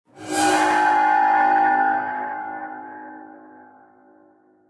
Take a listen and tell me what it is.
Saw Cutting
Saw synthetically made for cutting
anxious, bass, bones, breathe, circular, creepy, cut, cutting, drama, flesh, ghost, haunted, horror, phantom, saw, scary, sinister, spooky, stress, tension, terrifying, terror, thrill